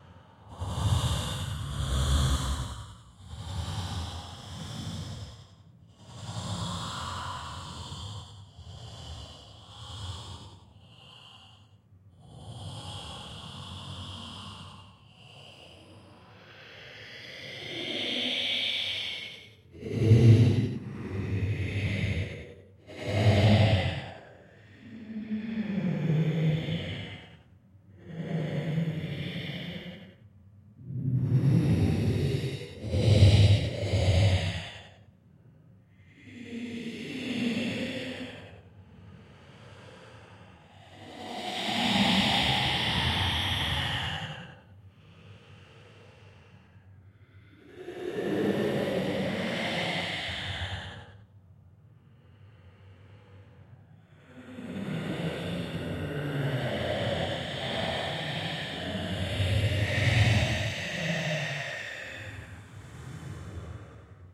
I made disgusting noises with my mouth to sound like a monster and did some pitch lowering and what not.
grrroooahhhhsuuguhhhh aaaaaaauhhh ggghhuuhaaa *monster for "use the sound file for whatever you want"